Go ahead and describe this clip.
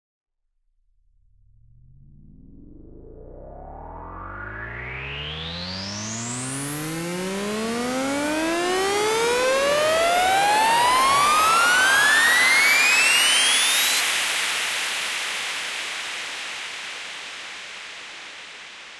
Riser Pitched 03b
Riser made with Massive in Reaper. Eight bars long.